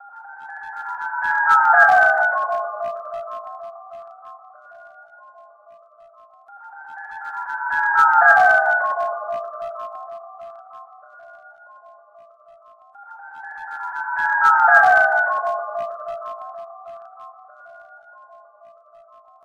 A weird alien ice cream man speeds past you, not stopping!